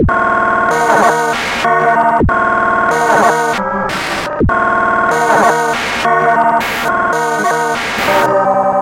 This Is A Call To Arms Sound
Smooth,Bassy,Soft,Hype,Dreamy
Dance, EDM, Electro, Loop, Remix, Sample